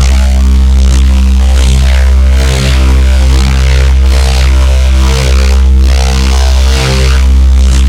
ABRSV RCS 013
Driven reece bass, recorded in C, cycled (with loop points)
harsh, driven, bass, reece, heavy, drum-n-bass